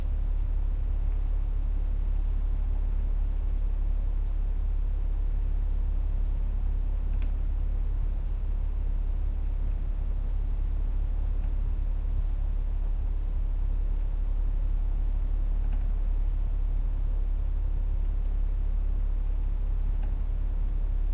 The wind and motor noise of a small electric fan. Stereo Recording ZOOM H4n using Rode NTG1 and Rode NT1-A.
Electric, fan, Household, Motor